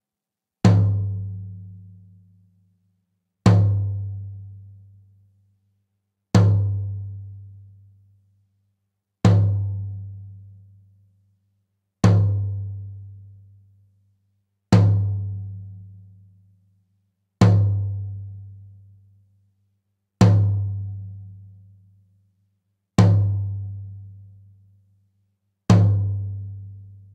Ganon Mid Tom
recording of a mid rack tom drum